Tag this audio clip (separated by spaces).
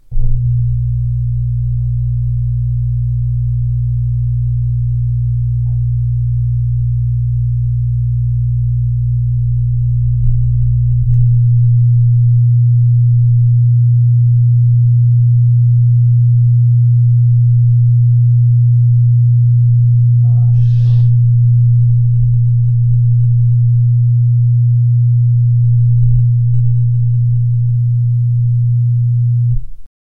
foley messager vibrator